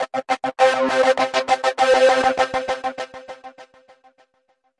THE REAL VIRUS 02 - SINETOPIA LFODELAYS 100 BPM - C4
This is what happens if you put two sine waves through some severe filtering with some overdrive and several synchronized LFO's at 100 BPM for 1 measure plus a second measure to allow the delays to fade away. All done on my Virus TI. Sequencing done within Cubase 5, audio editing within Wavelab 6.